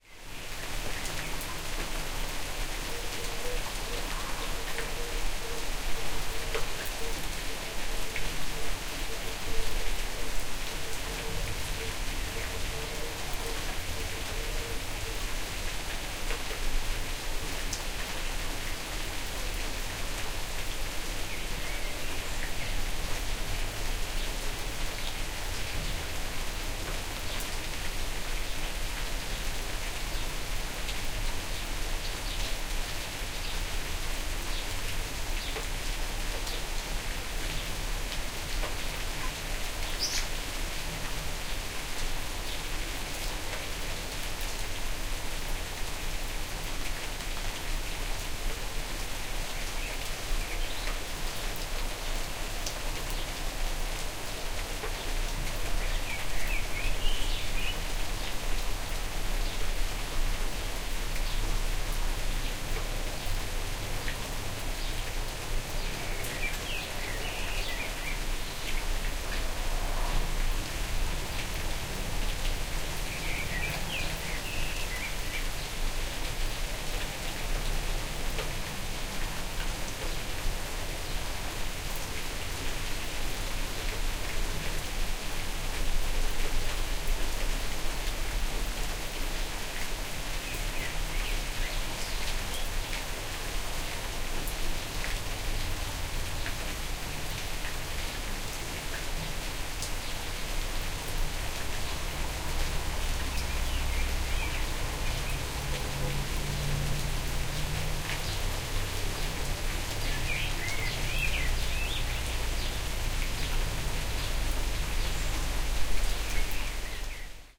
Rain Birds 3
Medium rain on grass and tiles, Birds (Wood Pigeon, Blackbird, Swallow), recorded on a porch on a garden.
swallow, birds, rain, pigeon, blackbird